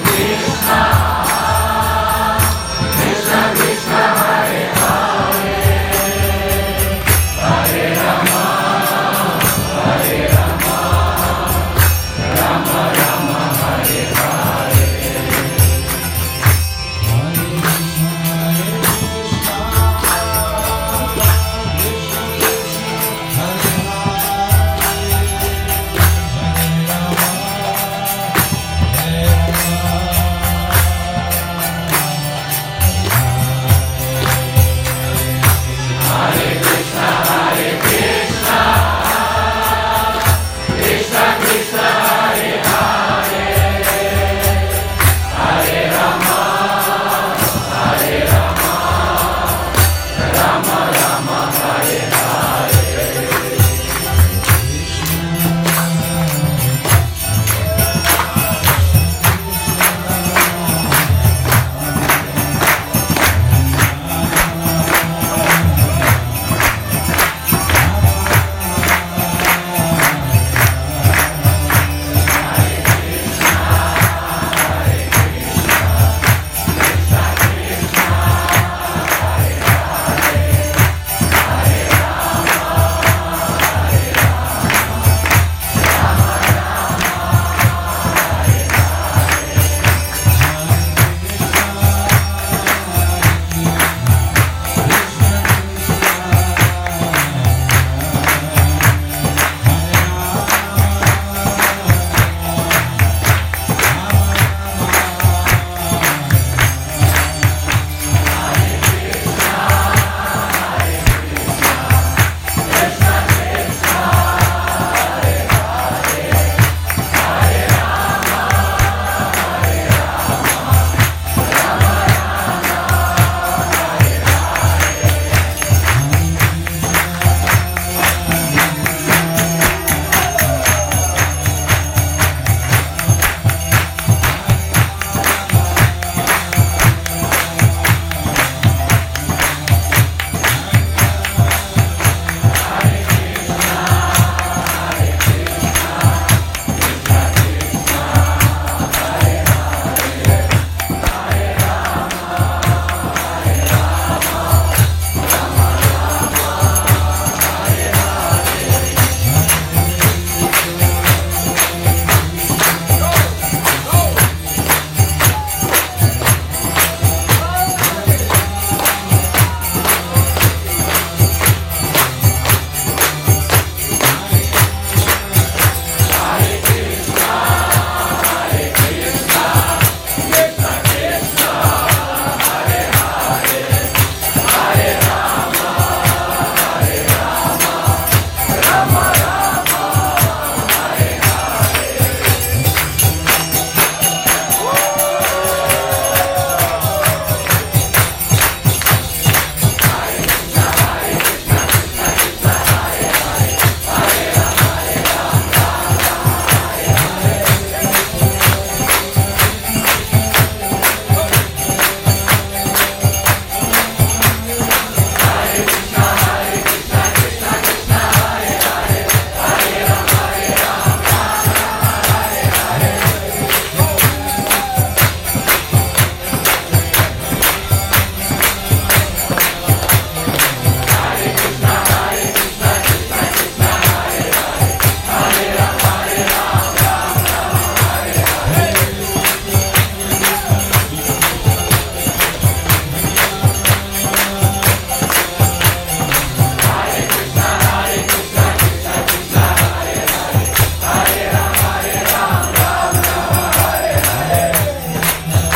kirtan song 08.09.2018 19.36
this recording was made in moscow at one of the Krishna services, on the day when Chaitanya Chandra Charan Das one of the teachers came